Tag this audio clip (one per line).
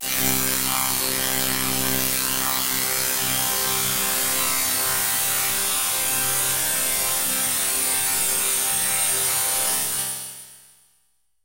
comb
grain